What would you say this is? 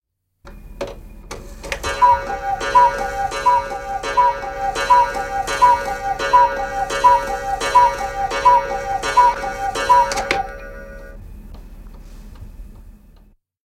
Käkikello lyö 12 nopeasti. Sekä kukkumiset että kellonlyönnit samanaikaisesti.
Paikka/Place: Suomi / Finland
Aika/Date: 1957